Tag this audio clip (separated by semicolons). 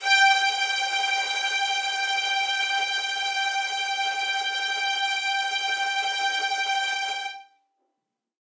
single-note; multisample; strings; fsharp5